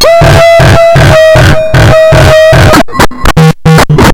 Hold the siren, Its a glitch!
bending, circuit-bent, coleco, core, experimental, glitch, just-plain-mental, murderbreak, rythmic-distortion